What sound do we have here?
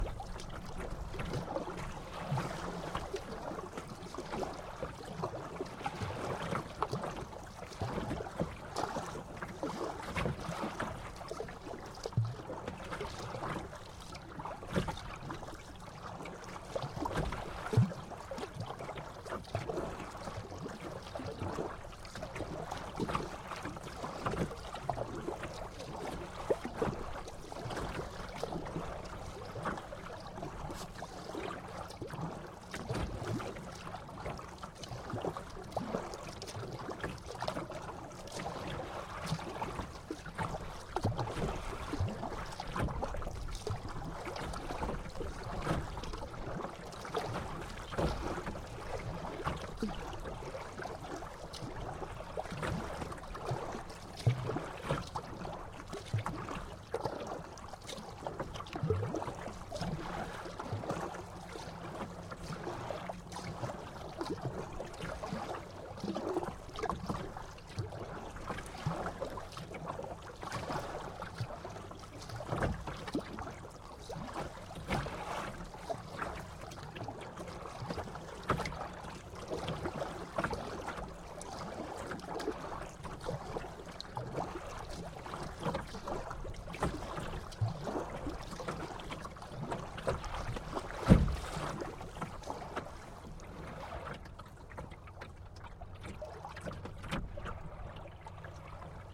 Kayaking in rough weather
Paddling my kayak across the fjord on a windy day. Waves breaking, hitting the hull, and oar working the water.
Always fun to hear where my recordings end up :)
boat, canoe, dock, fjord, harbour, kayak, oars, ocean, paddle, paddling, river, rowing, sea, splash, water